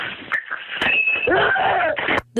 Baseball bat hitting followed by a scream
Baseball-bat, home-run, smack, scream, hit, pain, ping, bat, yell